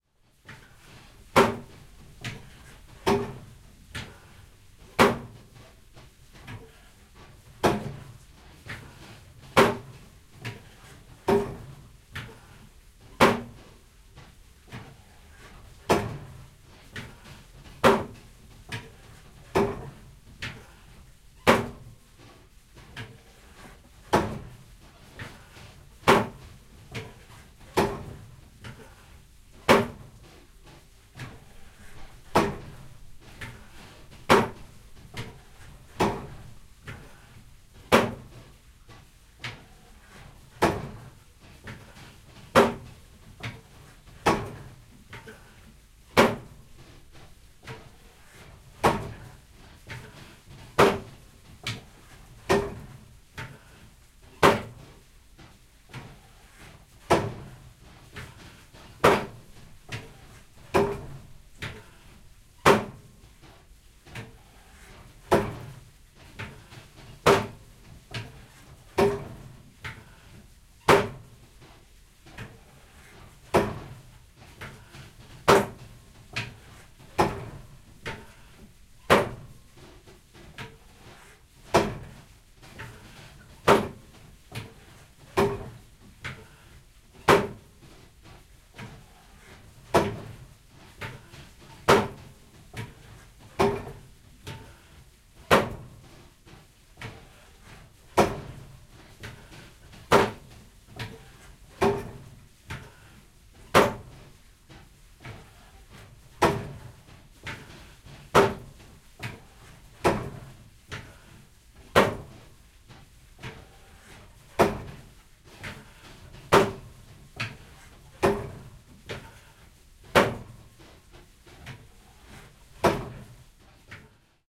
0359 Water mill
Water mill inside a traditional house at Gyeongbokgung Palace.
20120711